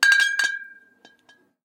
Clink of bottles of spirit.